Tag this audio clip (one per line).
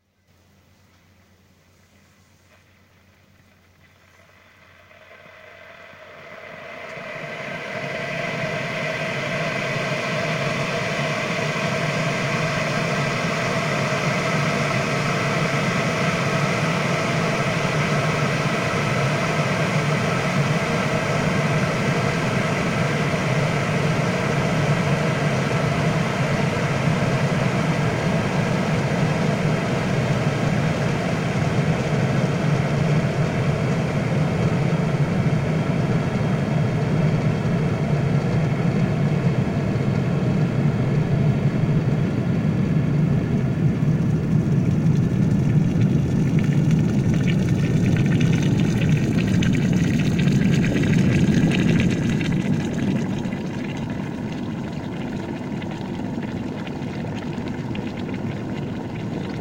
appliance
Water
Boiler
kitchen